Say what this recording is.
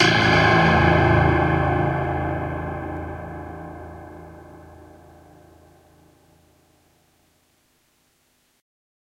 THE CLOUD in A
I wanted a sound similar to the famous "Cloud" sound in Star Trek: The Motion Picture, which was created using a very unusual instrument called a Blaster Beam. I created this version using harpsichord and clavichord samples with some distortion, chorus and reverb.